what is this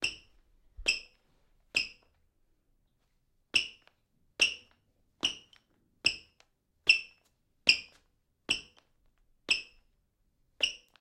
Shoes stepping on a tiled floor. A loud squeaky sound is made as the shoes step on the floor. Recorded with a zoom H6 and a stereo microphone (Rode NTG2).
feet, floor, shoes, squeaking, squeaks, squeaky, stepping, tiles